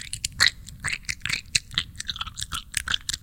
Disgusting Chewing
eating, gum, mouth, smacking